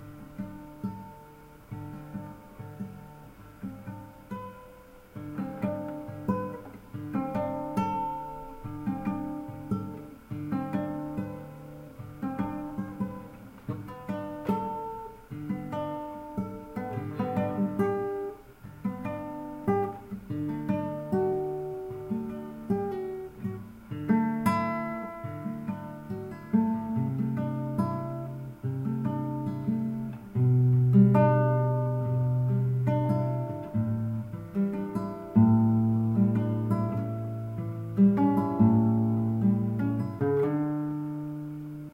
Finger-style chord progression on nylon Yamaha C-40 acoustic guitar.
acoustic, chords, clean, guitar, nylon-guitar, open-chords